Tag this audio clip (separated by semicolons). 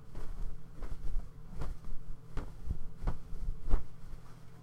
cloth; move; sound